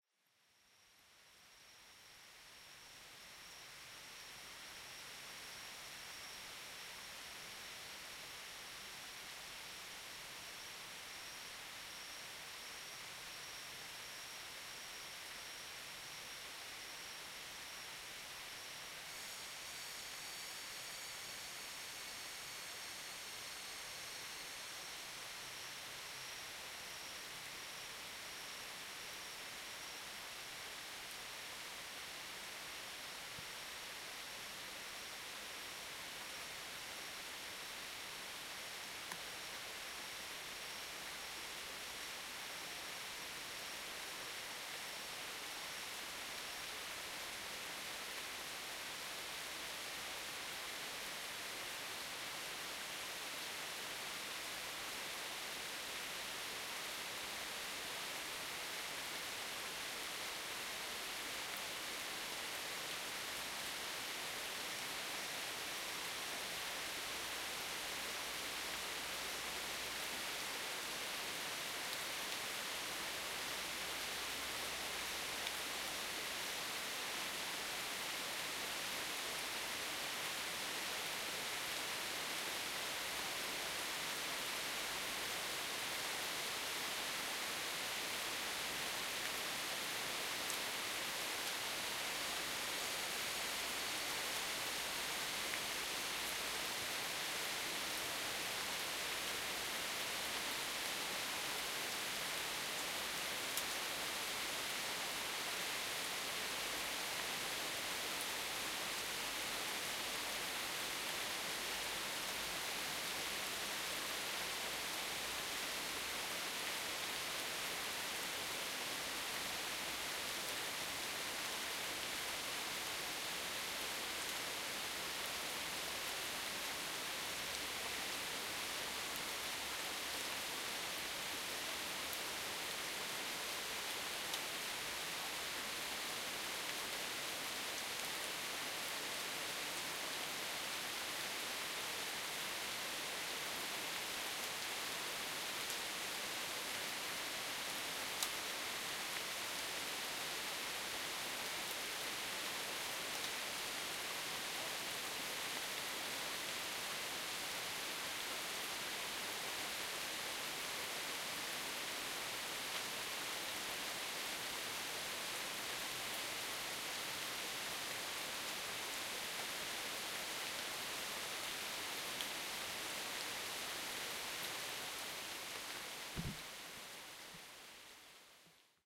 Jungle Rainfall
Heavy rain in the jungles of Thailand